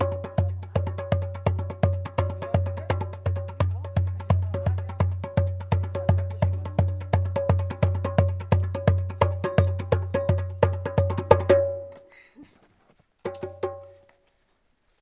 drum open air
H4zoom recodered (place: Quebrada de Here, Toconao, Andes Mountain on North of Chile) processed with Sound Studio (normalize to 0db).
I Work in documentary and fiction films in Chile.
These small sound clips I made while accompanying friends to make hands-free climbing on a small creek near Toconao south of San Pedro de Atacama. They like to play drums to quench anxiety. Was in October 2012.
ambient, drum, field, improvised, percussion